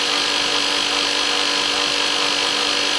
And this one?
Belt grinder - Arboga - Run loop
Arboga belt grinder running freely, works as a loop.
1bar, 80bpm, arboga, belt-grinder, crafts, labor, loop, machine, metalwork, run, tools, work